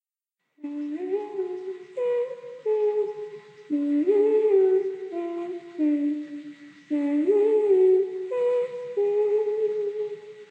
A woman humming
humming, loop